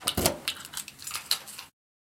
A key gets pulled out of a metal lock.
chain, door, foley, key, keychain, keyring, keys, lock, locking, metal, slam, unlock, unlocking